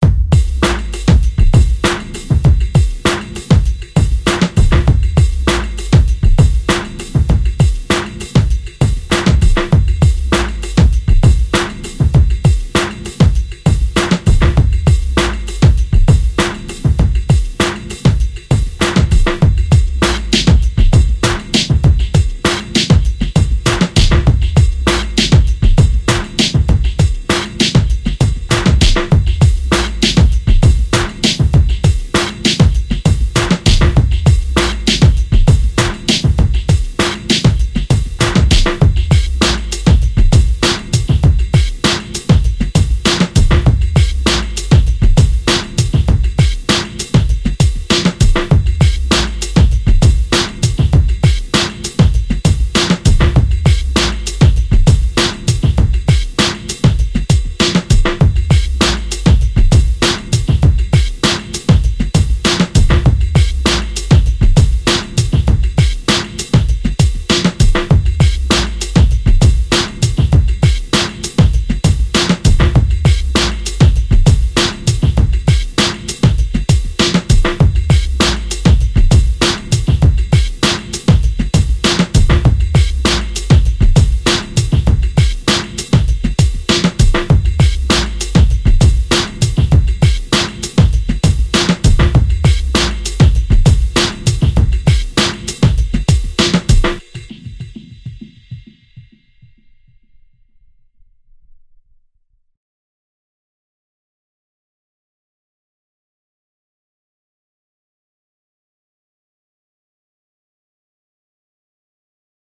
Heres a quality Fat "Street" beat ready for use in a aggressive Hip-Hop or Big-beat production.
Long sample containing subtle variations and a ending tail fx.
Lots of bass and sub in the kick, designed to bang hard in a car system.
PS:Would appreciate a link to interesting finished productions using it!